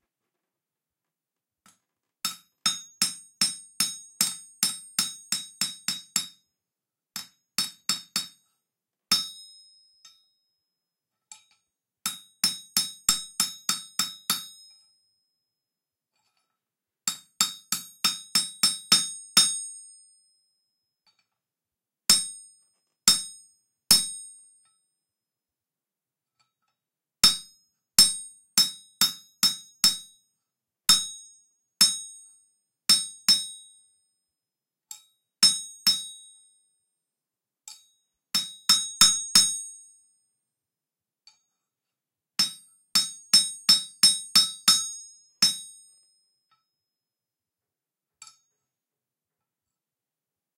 Anvil & Steel Hammer
Stereo recording. Shaping and flattening a knife blade with a steel hammer on a small anvil mounted on a block of wood. Rode NT4 > FEL battery pre amp > Zoom H2 line in.